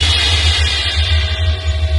This rhythmic drone loop is one of the " Convoloops pack 03 - China Dream dronescapes 120 bpm"
samplepack. These loops all belong together and are variations and
alterations of each other. They all are 1 bar 4/4 long and have 120 bpm
as tempo. They can be used as background loops for ambient music. Each
loop has the same name with a letter an a number in the end. I took the
This file was then imported as impulse file within the freeware SIR convolution reverb and applied it to the original loop, all wet. So I convoluted a drumloop with itself! After that, two more reverb units were applied: another SIR (this time with an impulse file from one of the fabulous Spirit Canyon Audio CD's) and the excellent Classic Reverb from my TC Powercore Firewire (preset: Deep Space). Each of these reverbs
was set all wet. When I did that, I got an 8 bar loop. This loop was
then sliced up into 8 peaces of each 1 bar. So I got 8 short one bar
loops: I numbered them with numbers 00 till 07.